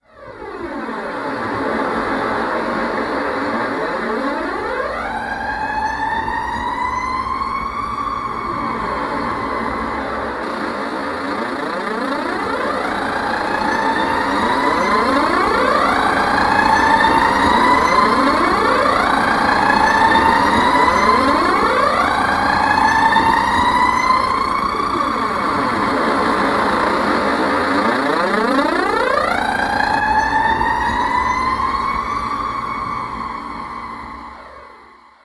motor caracas
a bus engine recorded at caracas city, process with audition
bus, field-recording, process, engine, street